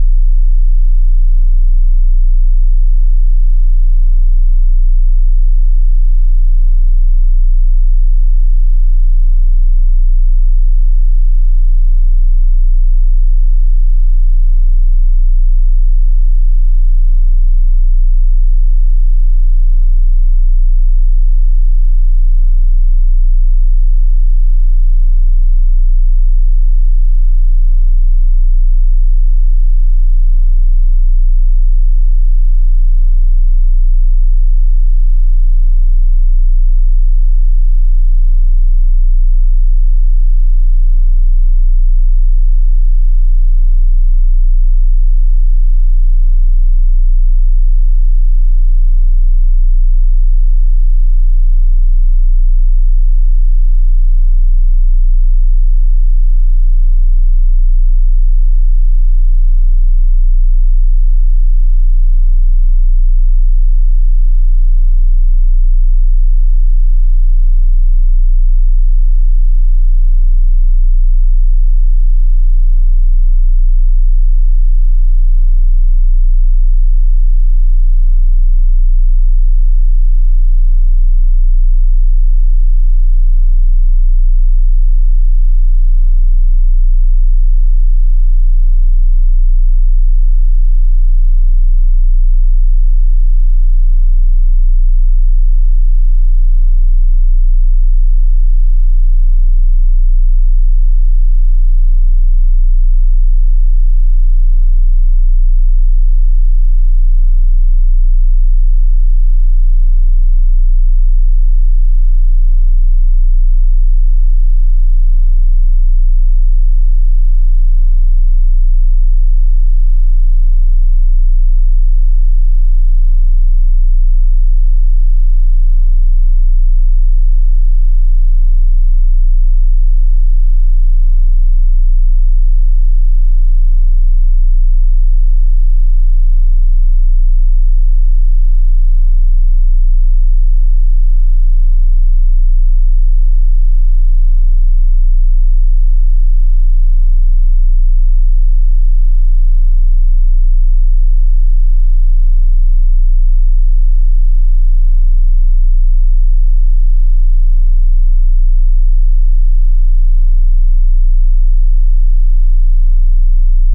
30hz sine wave
over 2 minutes of pure 30hz sine pattern for you LFE addicts out there
30, hz, sine, wave, LFE, 30hz